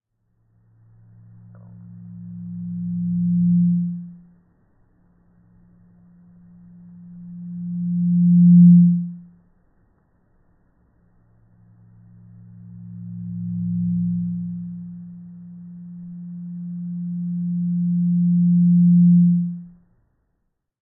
Sound of feedbacks. Sound recorded with a ZOOM H4N Pro.
Son de larsens. Son enregistré avec un ZOOM H4N Pro.
audio, audio-feedback, check, feed, feedback, live-performance, mic, microphone, test, testing